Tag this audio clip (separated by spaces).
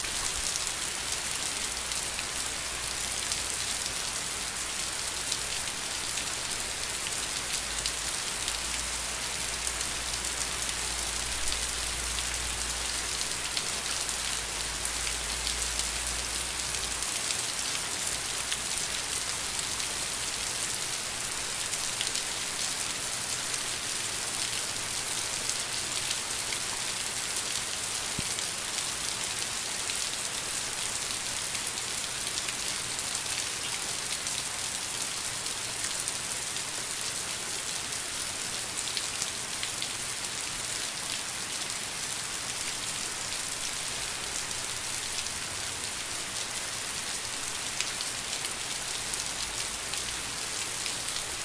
exterior patio concrete precipitation rain hard